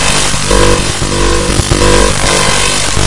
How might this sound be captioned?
Glitch Element 20
Glitch production element sourced from an Audacity Databending session
data, databending, glitch, production-element, raw